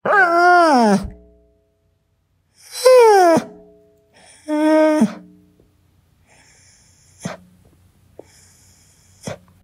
dog crying
animal, dog, whimper, whine, yelp